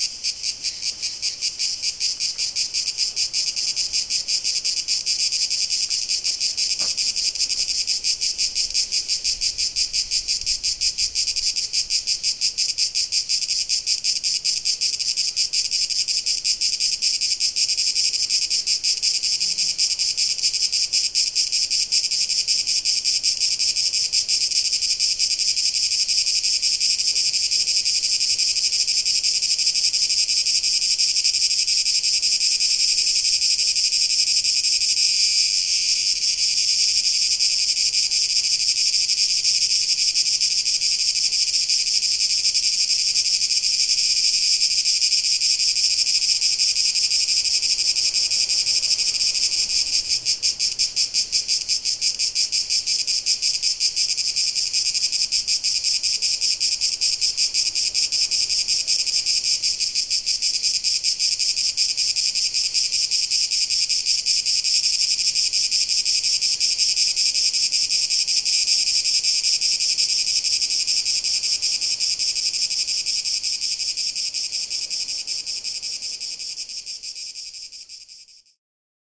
zoom recording at Naxos island, Greece
cicadas, field-recording, insects, summer